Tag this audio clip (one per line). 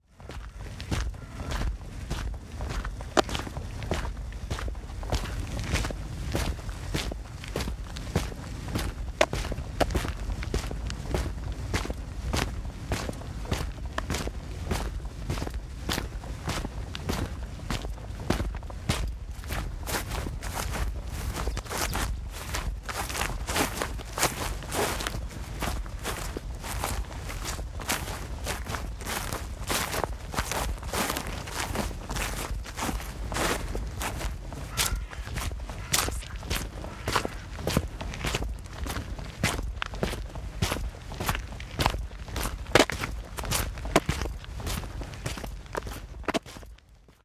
boots
crunch
foley
grass
outdoors
sfx
trail
walk
walkingboots